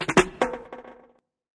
Intro 02 77bpm
Roots onedrop Jungle Reggae Rasta
Jungle, Reggae